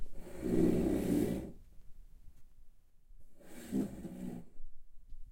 Mulitple Classroom chairs sliding back
chairs, school, sliding, kids, classroom